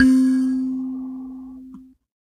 a sanza (or kalimba) multisampled with tiny metallic pieces that produce buzzs